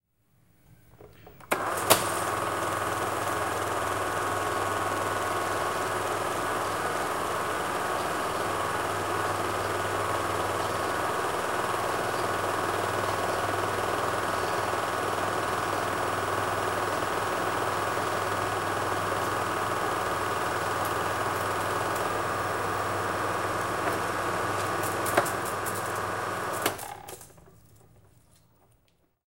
Super 8 projector Start Run Stop
Recorded with a Zoom H4N in a Medium sized room. The clip is of a Super 8 Cine Projector (domestic)starting up running (can be looped) and stopping.
8, cine, operation, projector, running, starting, stopping, super